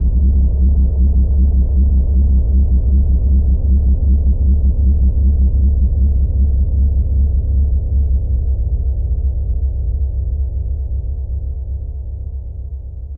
Low freq rumble
Low-frequency slightly pulsating noise, made with Waldorf Pulse routed through a Sherman Filterbank.
noise, electronic, synth, low-frequency, analog